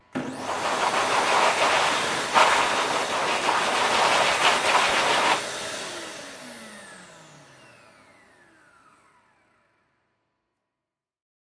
Recording of a Hand-dryer. Recorded with a Zoom H5. Part of a pack